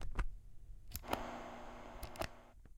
radioanalog button onoff
Recording of on-off buttons on a radio AIWA FR-C12 in a small studio room.
Sound recorded with Zoom H2
Sonido grabado con Zoom h2
on-off, percussive, analog, noisy, radio, button